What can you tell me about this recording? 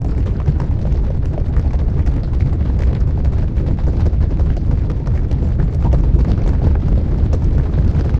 The sound of a huge stampede.
Created by pitch bending this FABULOUS sound: